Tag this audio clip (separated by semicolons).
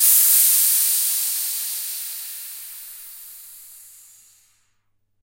tools; r26